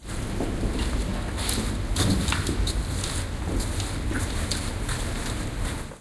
People walking.
This recording was made with a zoon h2 and a binaural microphone in the main music hall Casa da Musica, in Oporto.
binaural, music-hallpublic-space